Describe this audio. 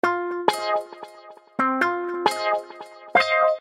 guitar, bass, loops